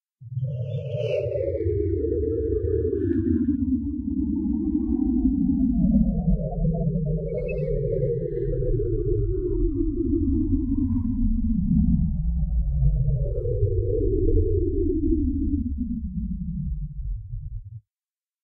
Synthetic sound.
Made in Coagula.